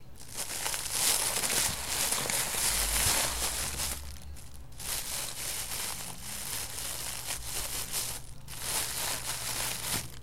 Fuego Lampara
simulating flames using a plastic bag
lamp-post, fire, flames